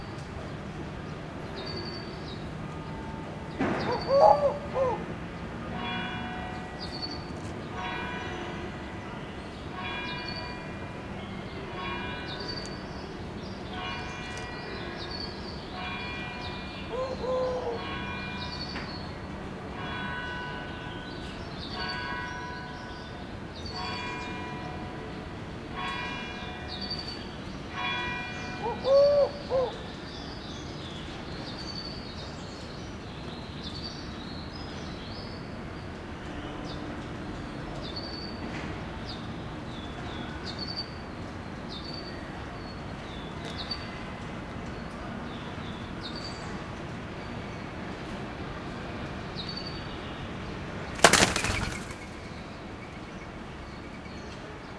20070120.collared.dove.02
mid-side stereo recording of a couple of Collared doves resting on the plants at my balcony. You can hear the noise of their fluttering at the end when they got scared and left. Chruch bells, canaries, and city noise in background. Senn ME66+AKG CK94 > Shure FP24 > Edirol R09